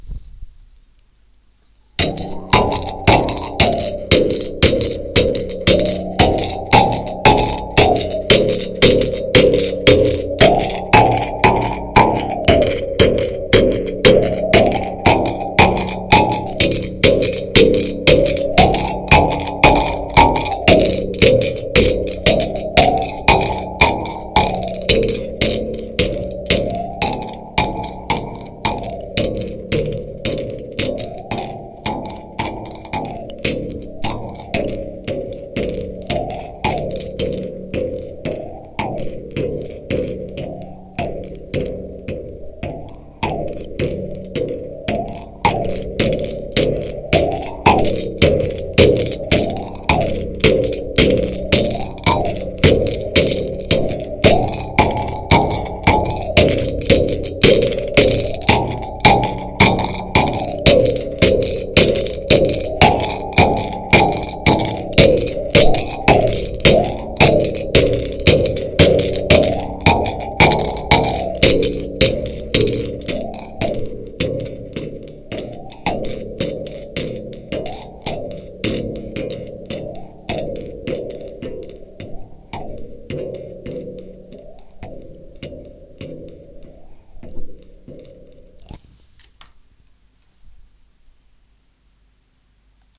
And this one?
Lakota senior dreams to the drum rhytm.